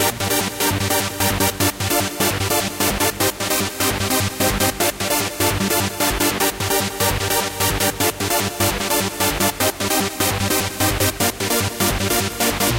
Electric Air 02
150-bpm, bass, beat, distortion, drum, drumloop, hardcore, kick, kickdrum, melody, pad, phase, sequence, strings, synth, techno